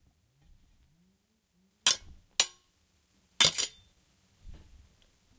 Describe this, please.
Union Sword Pull Away 2
Crappy Replica of a Civil War Union cavalry sword. All of these are rough around the edges, but the meat of the sound is clear, and should be easy enough to work with.
Pull-Away, Weapon, Lock, Civil-War, Sword, Action